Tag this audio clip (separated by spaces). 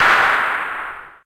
weapon shot gun shooting firing